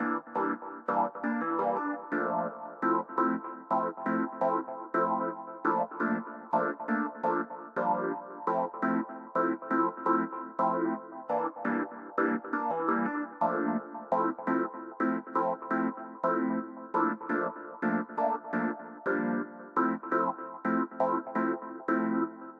jazzy steppin (consolidated)
kinda neat for jazzy stuff, made in FL Studio with Velvet